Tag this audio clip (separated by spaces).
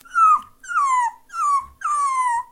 animal dog hungry puppy sad